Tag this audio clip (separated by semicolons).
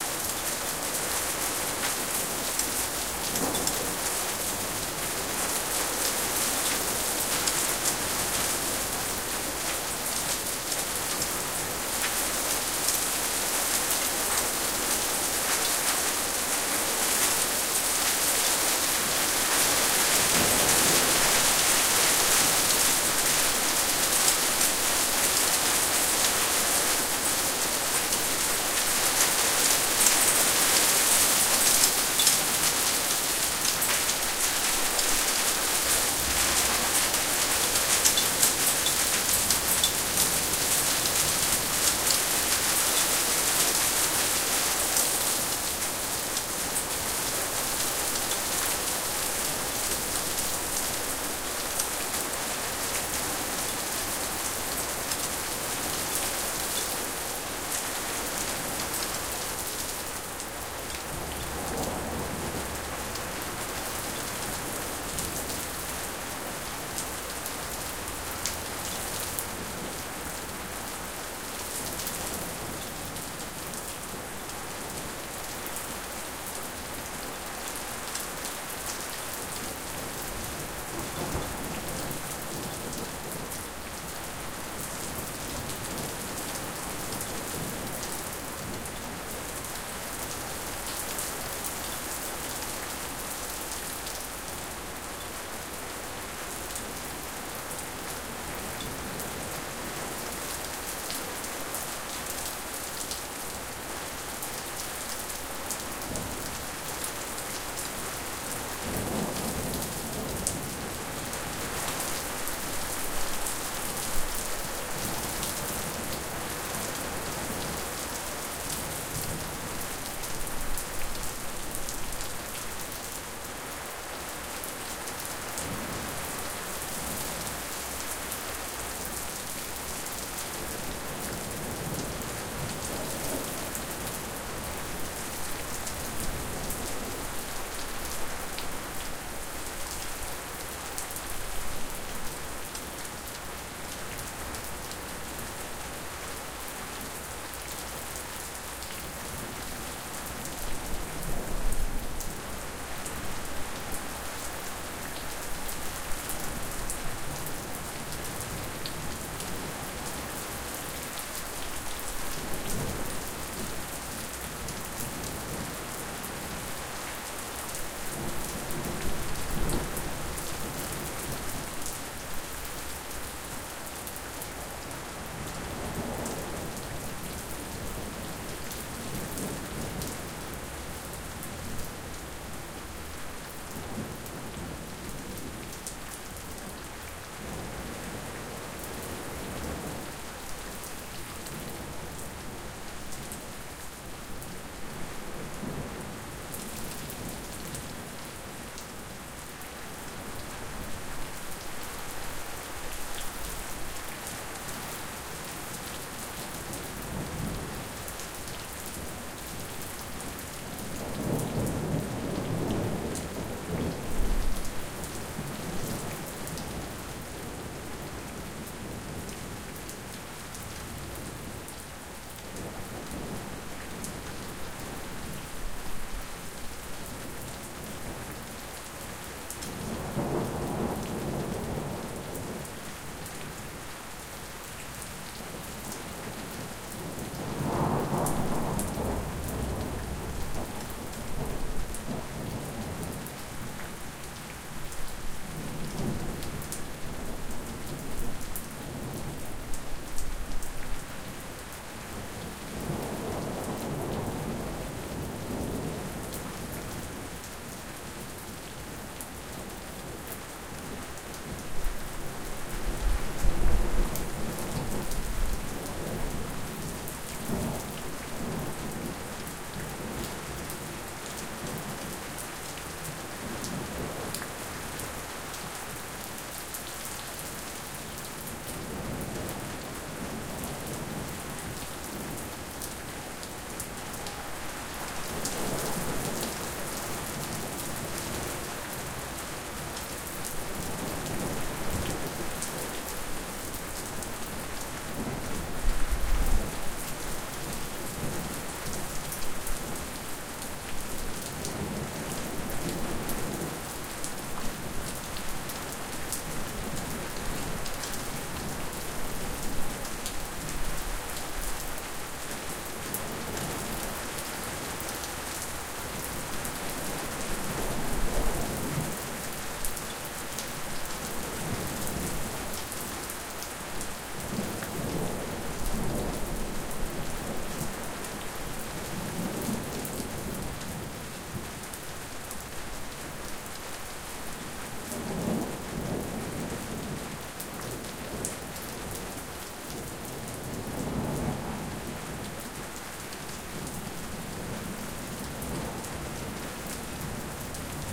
lightning; hail; rain; storm; ice; thunderstorm; thunder